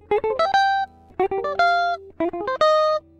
guitar jazz 4
Improvised samples from home session..
lines, groovie, acid, fusion, jazz, guitar, licks, funk, jazzy, pattern, apstract